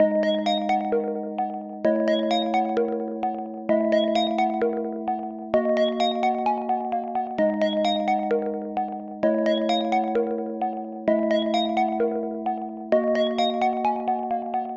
henri le duc
Peaceful and a bit stressful 4bar loop inspired by Lena Raine productions for Celeste. Only synths, no samples.
130bpm, electronic, loop, 4bar, synth, music